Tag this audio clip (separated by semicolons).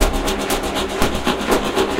dubspace; dub; deep; loop